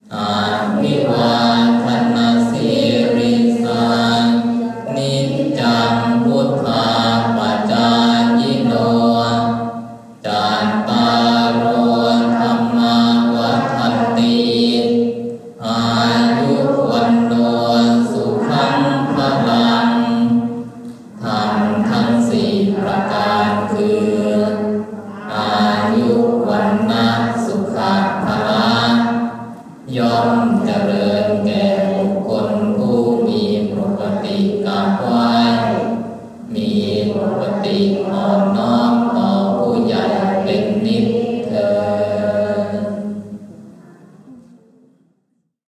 Thai Buddhist Monk Chant
field-recording of a Thai Buddhist prayer. Recorded on iPhone 6 and post-processed with Ozone 4. Contains male and female voices.